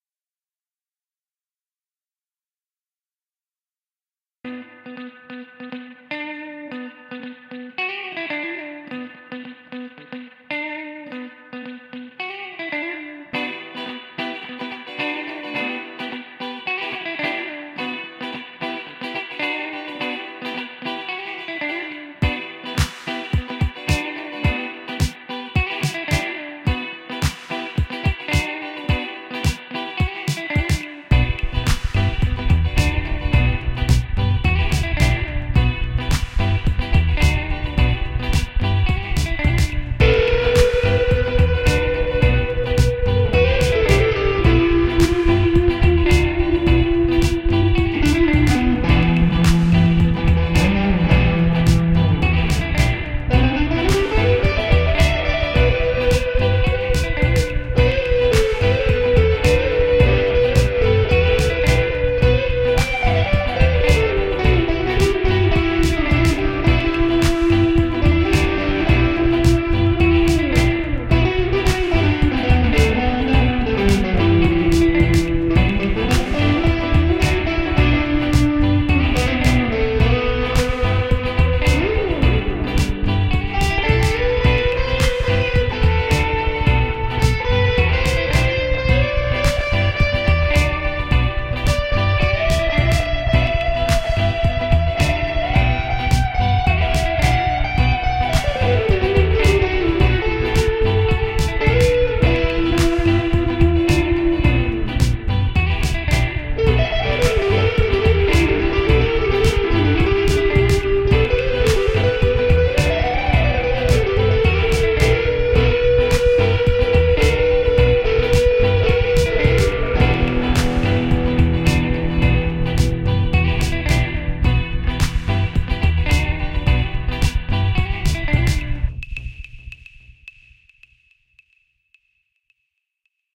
This is simple live improvisation with help Abletone live, where I played on my electric guitar. Recorded some guitars, bass, and drums loops for accompaniment, and use distortion electric guitar for a solo-lead party.
Look like some types of funk or electric dynamic music, I don`t know how is the right name of this genre. It will suit like a soundtrack for your different needs or background music for your podcasts. Enjoy:)